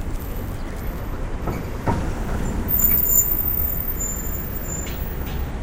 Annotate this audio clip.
City Sidewalk Noise with Drain Bump & Car Breaking Squeeks
public, field-recording, car, city, nyc, bump